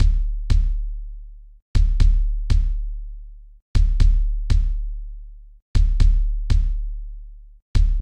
Stone Beat (120) Kick
Stone Beat (120) - Kick drum part isolated.
A common 120 bpm beat with a slow stoner feeling, but also a downtempo trip-hope texture.
svayam